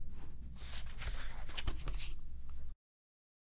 Open book
Open a book